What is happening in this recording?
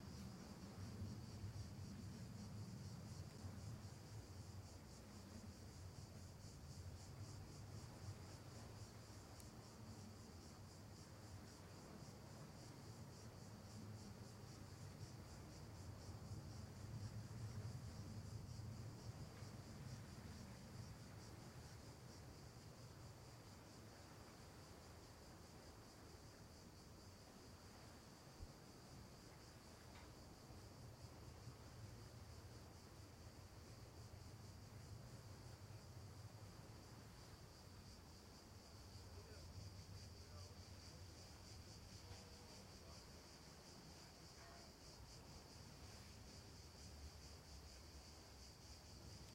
Ambience Cicadas Night 2
Ambience, Cicadas, Night